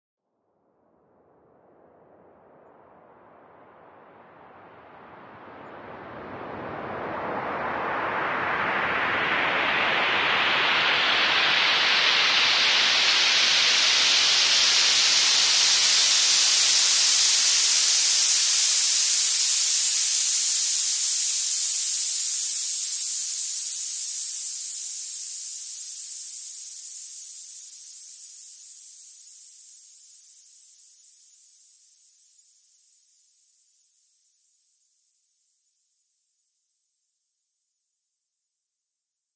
A Sample for transitions
Sweep, Raiser, Build-Up